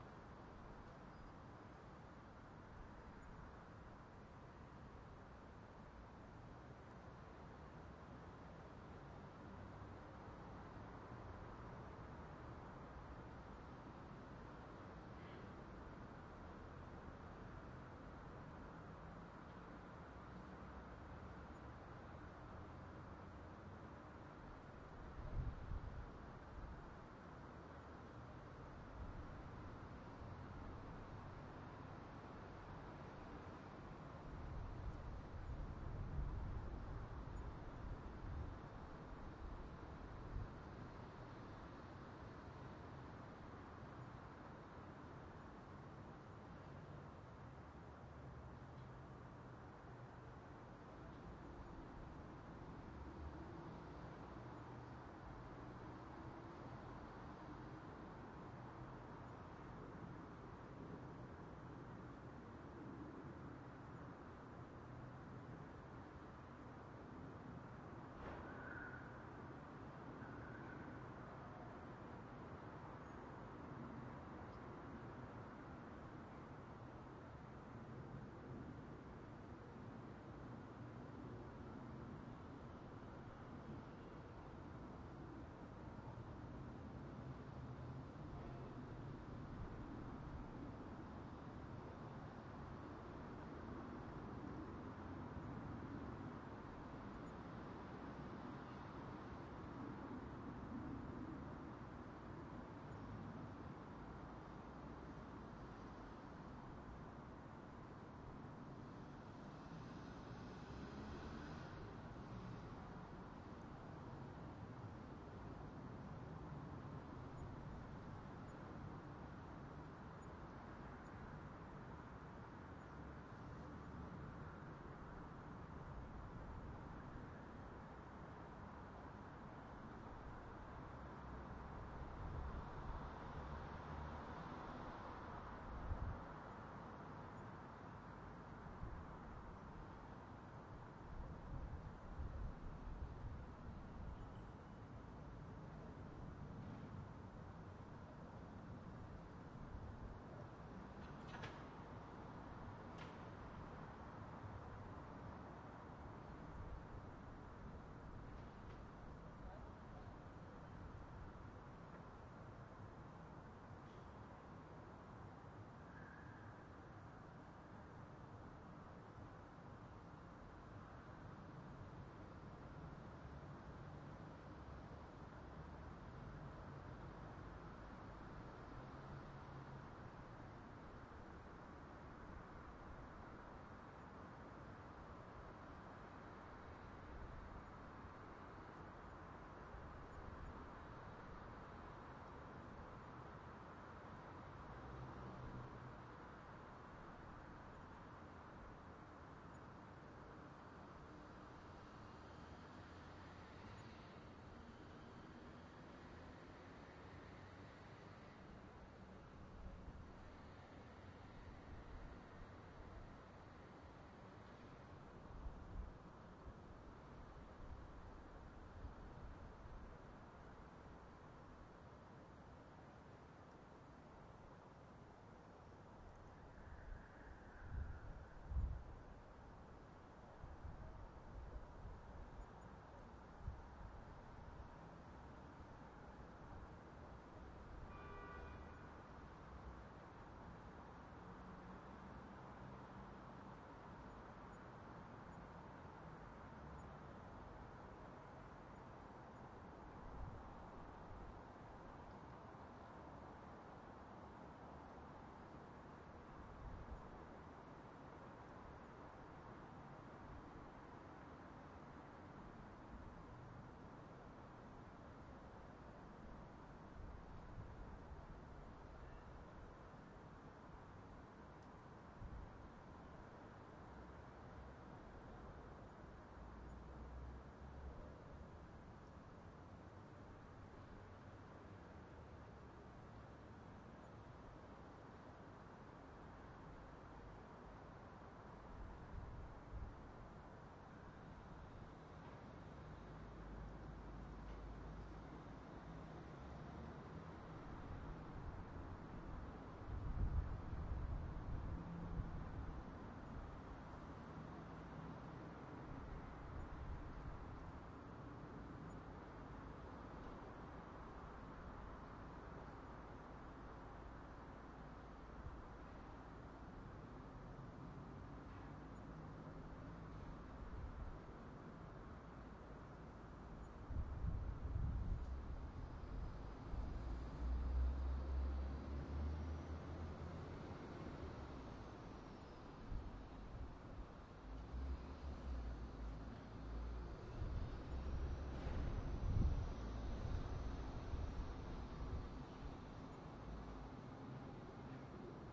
Ambience Berlin Rooftop
Distant, quiet, consistent city ambience: traffic at street crossing, some people, airplane. Motor rumble of starting/stopping vehicles, some distant voices, little Wind noise. Recorded with Tascam DR100mk2 (built-in cardiods 58mm/90°, Gain M9, 80Hz-HP), no processing. Recorded on an April evening on the rooftop of a 5 store building in Berlin Moabit.
city, atmo, field-recording, rooftop, ambience, atmosphere, berlin